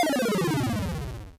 Simple retro video game sound effects created using the amazing, free ChipTone tool.
For this pack I selected the LOSE generator as a starting point.
It's always nice to hear back from you.
What projects did you use these sounds for?